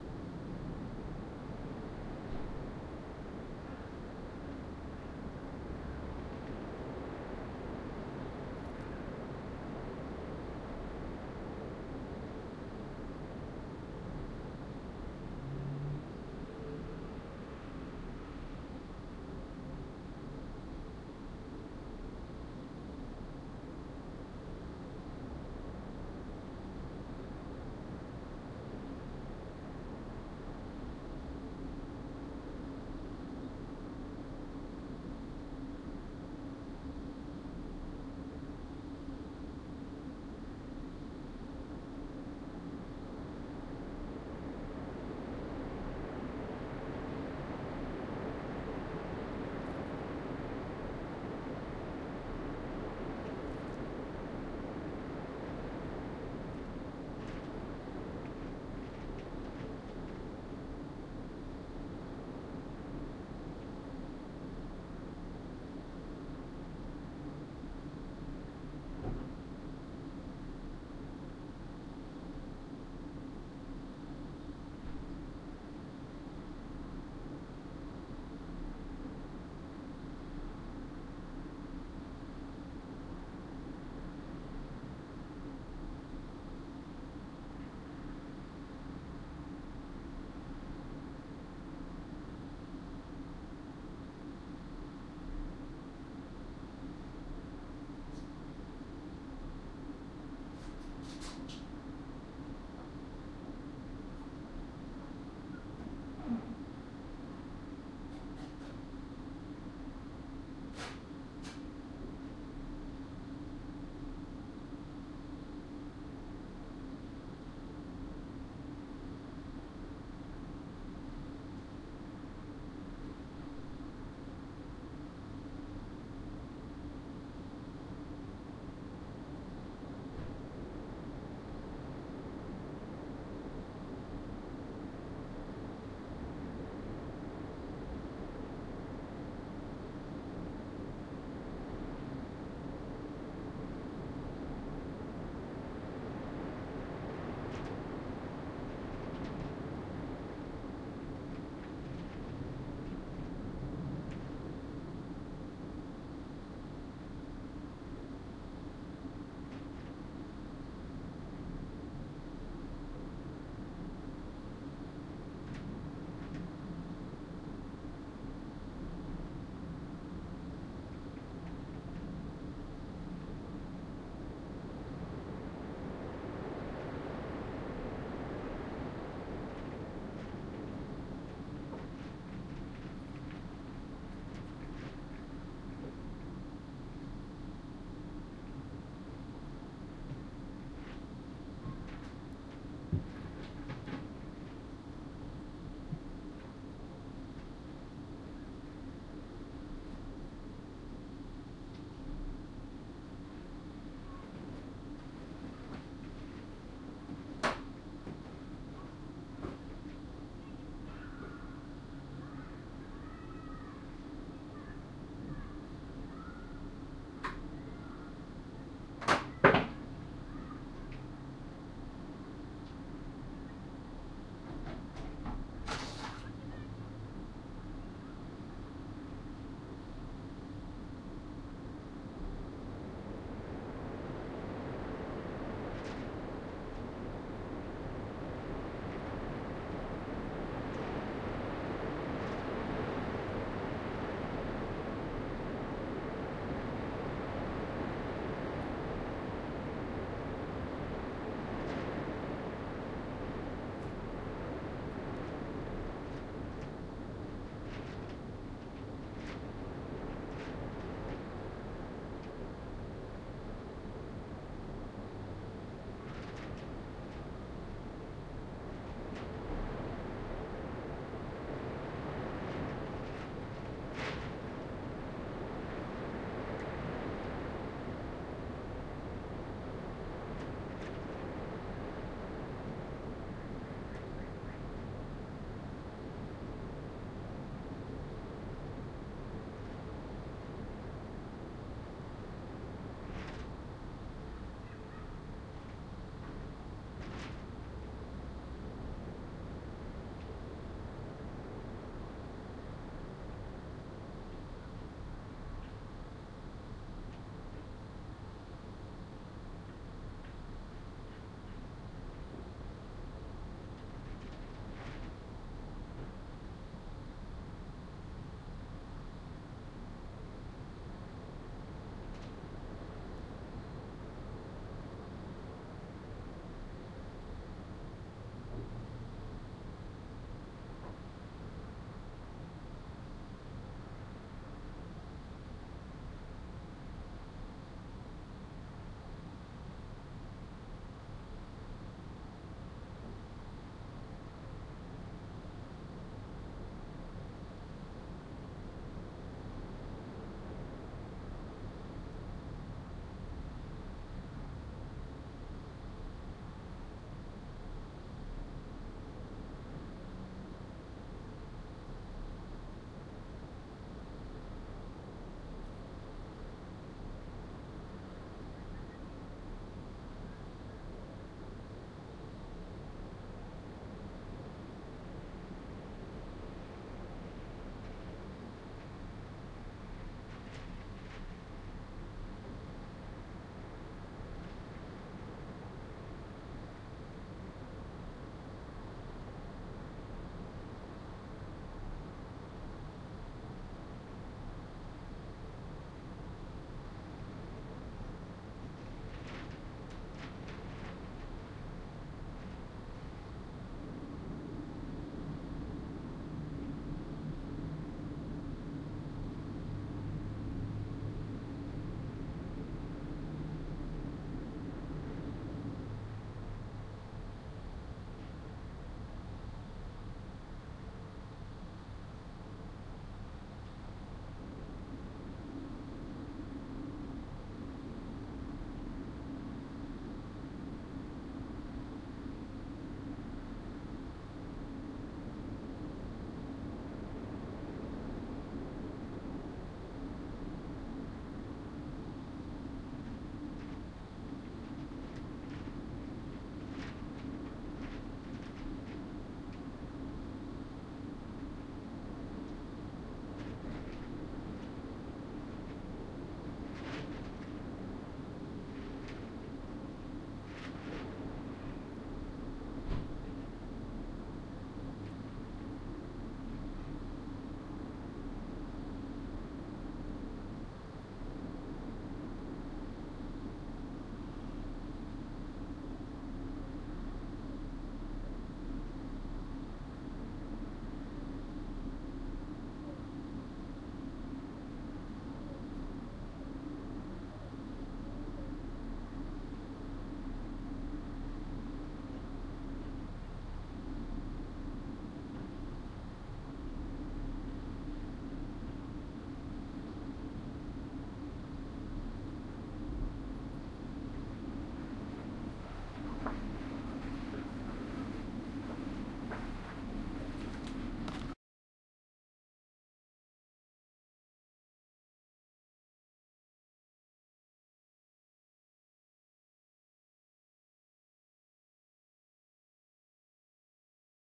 drone3 outdoor
An outdoor ambience, recorded (apparently) on some Winter day.
ambience, day, field-recording, outdoor, quiet